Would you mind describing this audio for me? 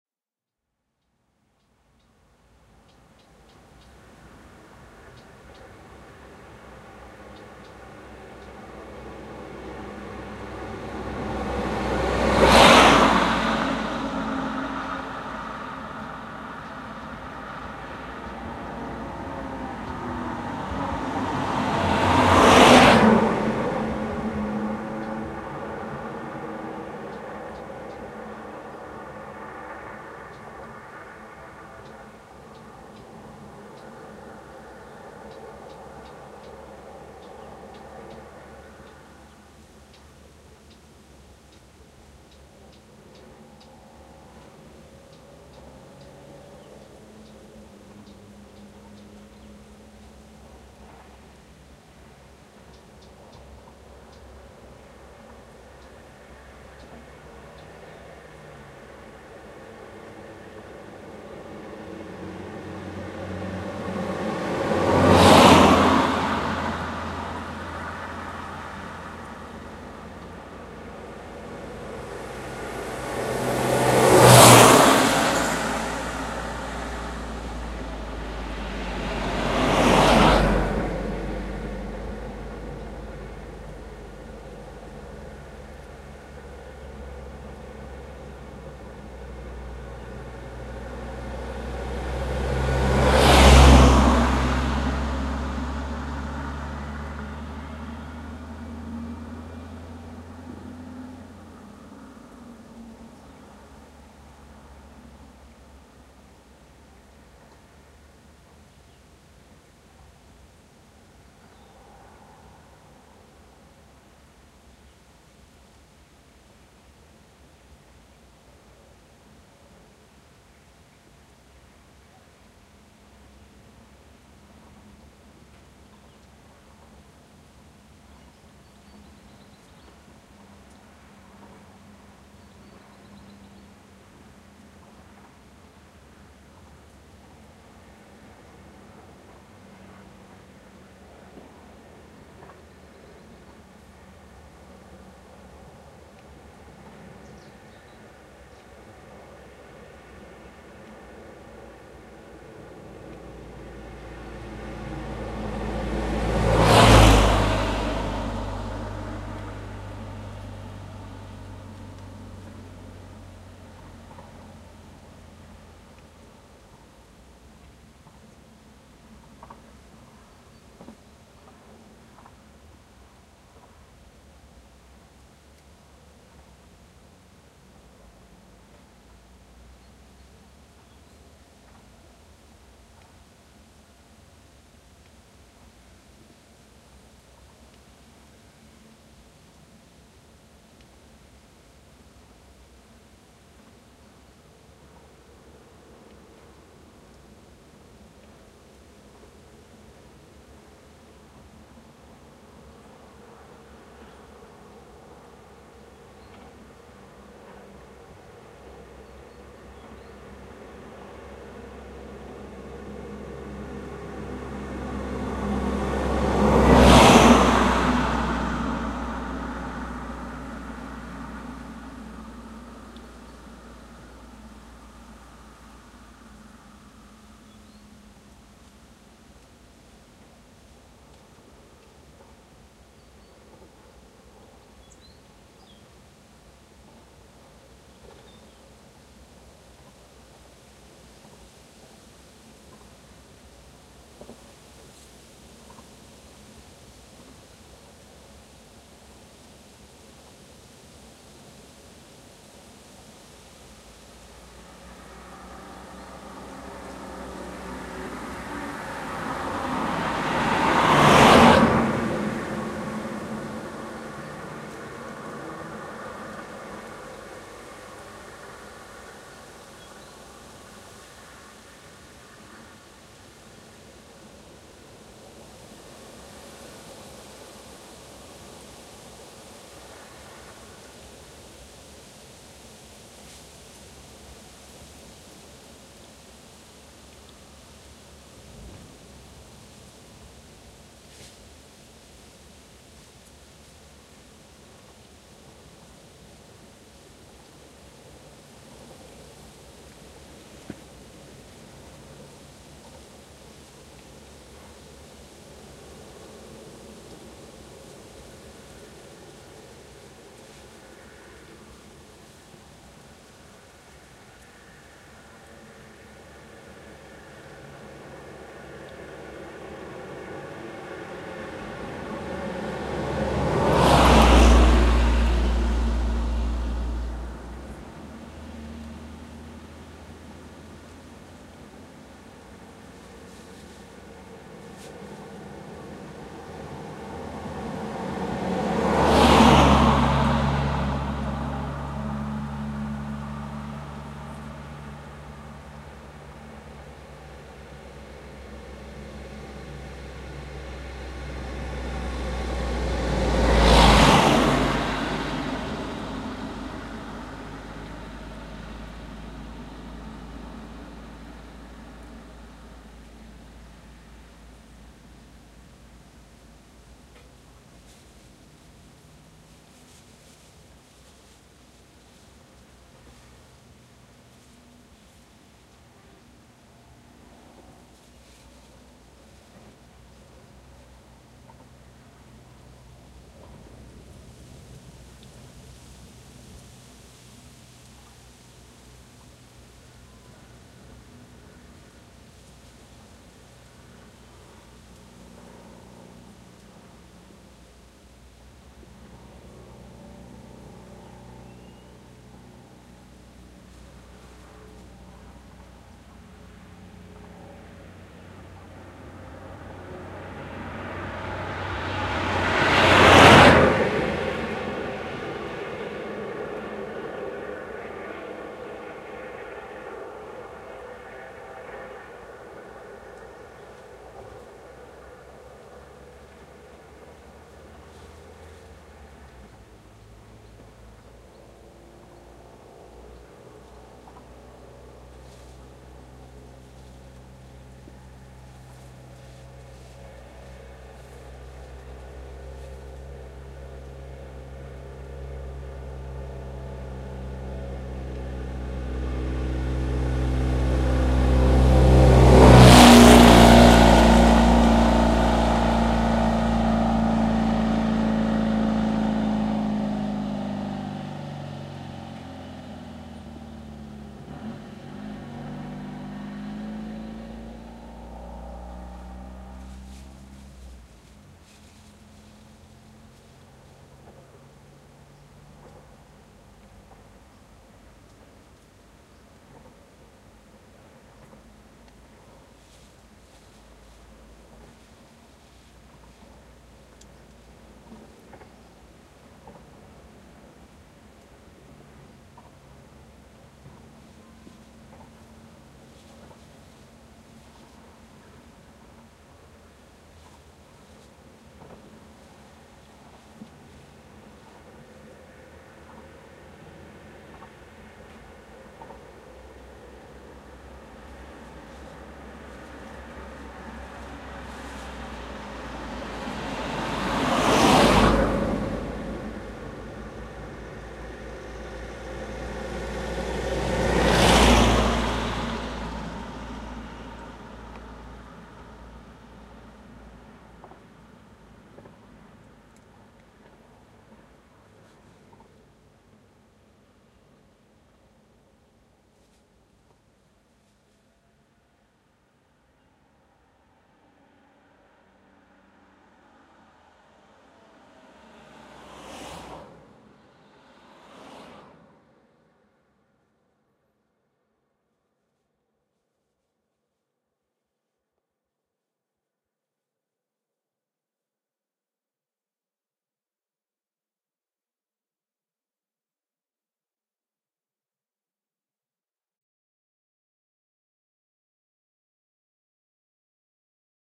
On a country road (from Piana degli Albanesi to Pioppo)
date: 2010, 07th Aug.
time: 07:00 PM
place: SS624 (a country road that links Piana degli Albanesi to Pioppo) near Piana degli Albanesi
description: This sound was recorded just outside Piana Degli Albanesi, in the road that links the country to Pioppo. Stopping the machine I recorded natural sounds of the road (wind, trees and birds) abruptly interrupted by the passage of the machines. This recording has been used in the second part of my work 'Scape # 003', presented as a thesis of the course of Music and New Technologies.